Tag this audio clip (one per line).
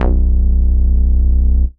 bass
saw
synth